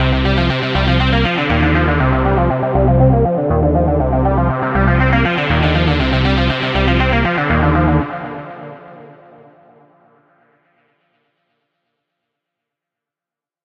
This soundwave is the synthesis of a guitar and a piano, both run through heavy distortion, and looped.
arp, music, electronica, techno